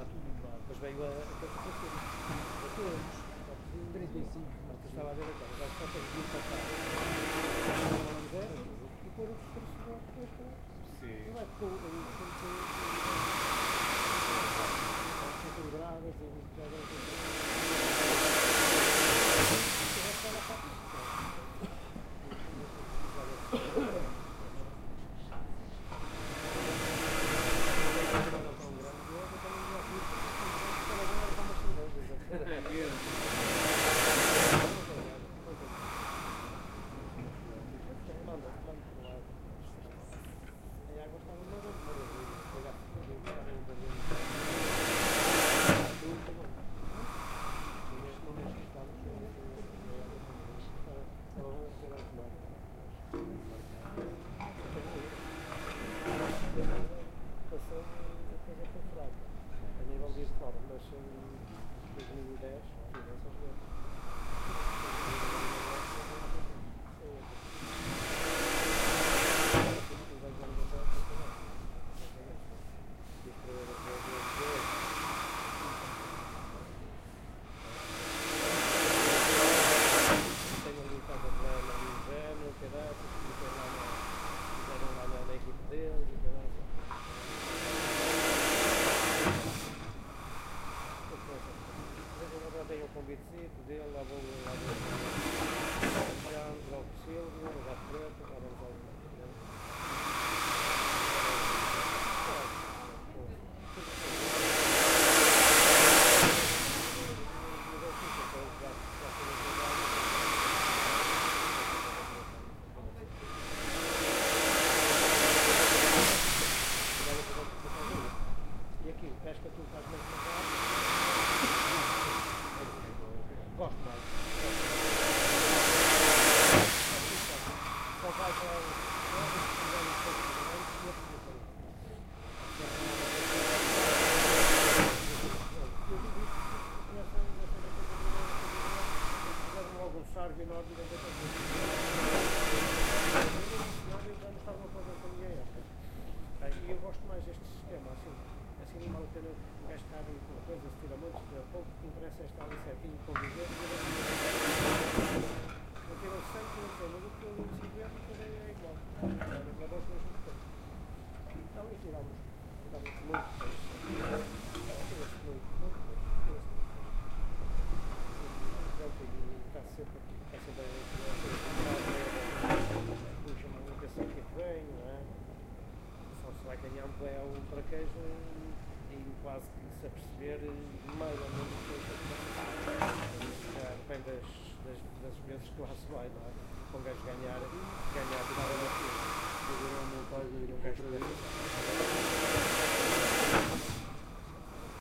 waves people talking portuguese
Recorded in Foz, Porto. It feels like people talking in the stomach of a whale.
ambient, conversation, people, waves